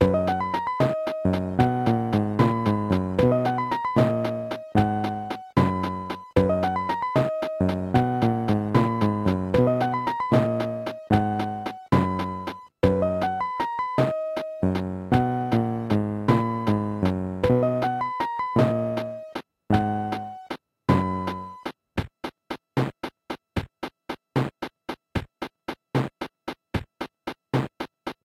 The accompaniment section from a cheap kids keyboard - the description doesn't really match the sound.
The accompaniment plays at three tempos followed by percussion only version of the same.